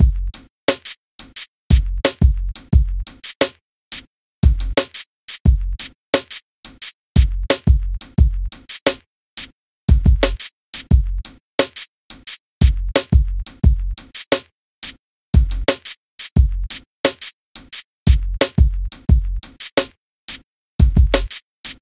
Hip Hop Drum Loop 19
Great for Hip Hop music producers.